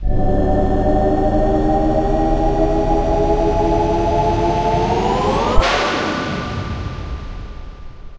ftl jump longer
A longer FTL Drive sound.